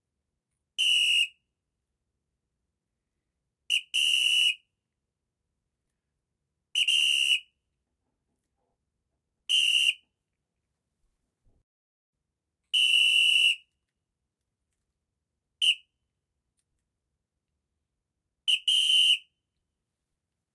Metal whistle in different durations for your project. Blown with high power and a tongued attack. I could not find the sound I wanted that I had in mind, and was tired of all the wimpy whistle sounds so I made my own with the whistle I keep on my car keys.
Recorded in stereo with a Zoom H5 and no processing.
umpire, coach, football, soccer, sport, referee, whistle